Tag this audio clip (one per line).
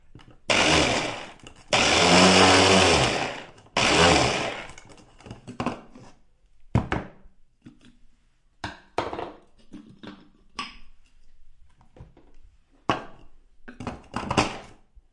blender
cook
cooking
kitchen
mixer